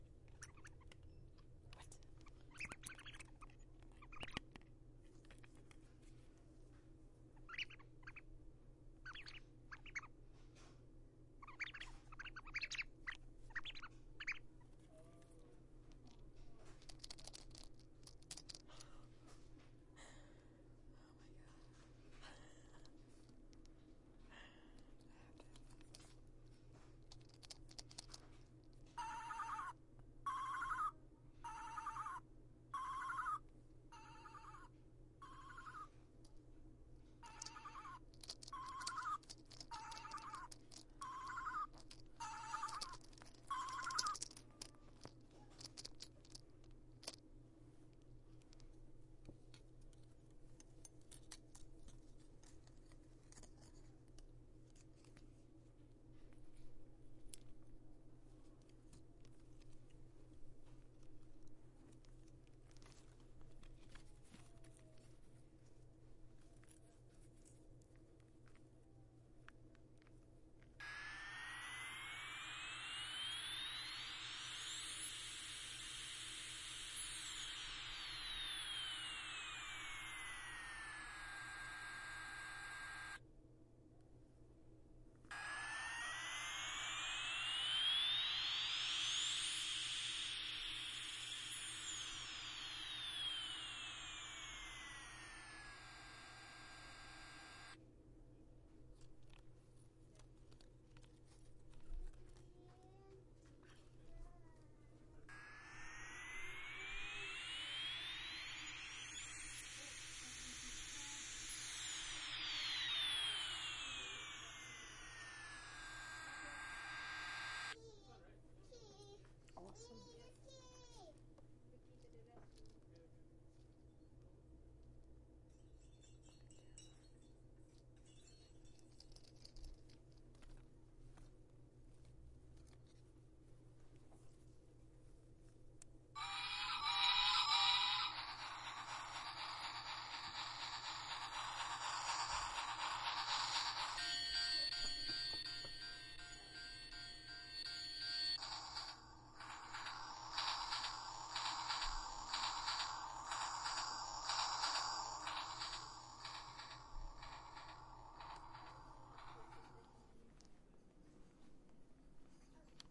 one in a series of recordings taken at a toy store in palo alto.